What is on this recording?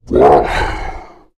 A powerful low pitched voice sound effect useful for large creatures, such as orcs, to make your game a more immersive experience. The sound is great for attacking, idling, dying, screaming brutes, who are standing in your way of justice.
Orc, RPG, Speak, Talk, Vocal, Voice, Voices, arcade, brute, deep, fantasy, game, gamedev, gamedeveloping, games, gaming, indiedev, indiegamedev, low-pitch, male, monster, sfx, troll, videogame, videogames